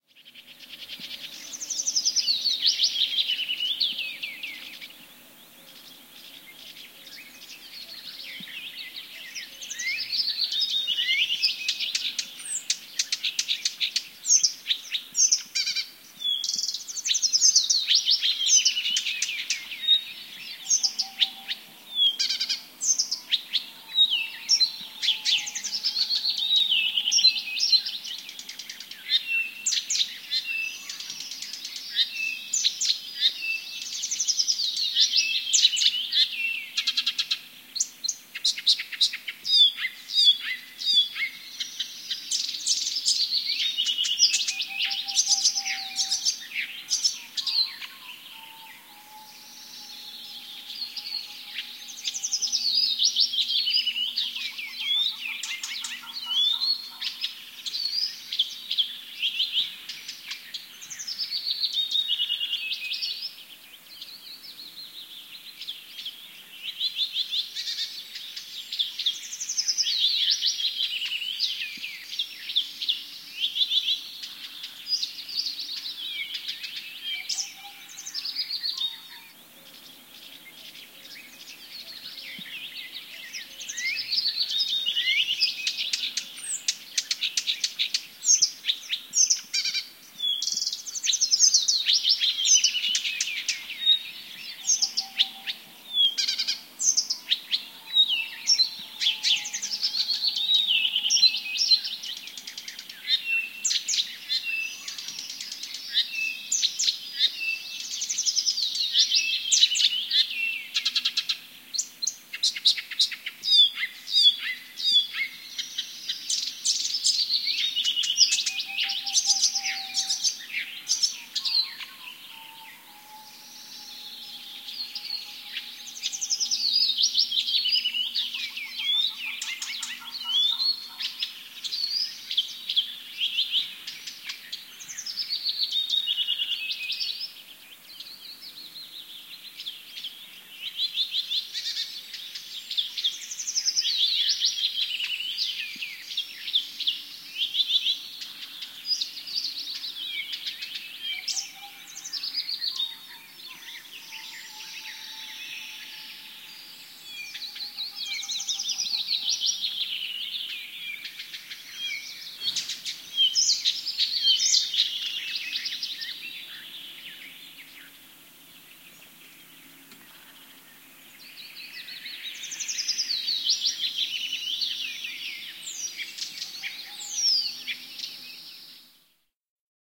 Linnunlaulu, lintuja metsässä / Birdsong in the evening in May, birds singing in the forest, sometimes a distant owl
Lintuja metsässä illalla toukokuussa, eri lajeja, välillä pöllö kauempana.
Paikka/Place: Suomi / Finland / Kitee, Kesälahti
Aika/Date: 16.05.2002
Yle, Linnut, Forest, Soundfx, Linnunlaulu, Finland, Suomi, Birdsong, Yleisradio, Luonto, Finnish-Broadcasting-Company, Nature, Field-Recording, Birds, Tehosteet